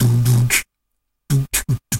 Beatbox 01 Loop 015e DaBoom@120bpm
Beatboxing recorded with a cheap webmic in Ableton Live and edited with Audacity.
The webmic was so noisy and was picking up he sounds from the laptop fan that I decided to use a noise gate.
This is a cheesy beat at 120bpm with a big boom kick.
Several takes and variations. All slightly different.
noise-gate, 120-bpm, Dare-19, rhythm, loop, bass, kick, bassdrum, boom, beatbox, boomy